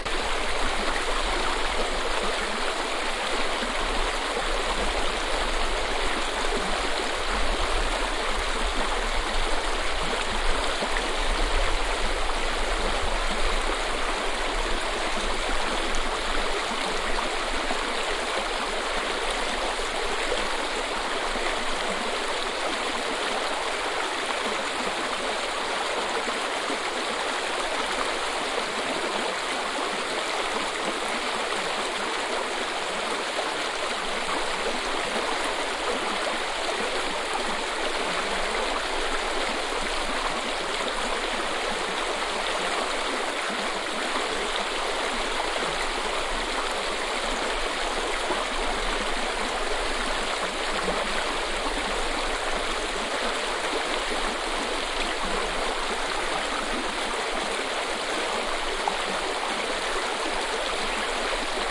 Mountainstream short clip
This track was recorded on the 1st of September 2007 with a Sharp MD-DR 470H minidisk player and the Soundman OKM II binaural microphones, a few yards up the stream. There are more gurgling sounds.
binaural, field-recording, forest, harz, mountains, mountainstream, river, stream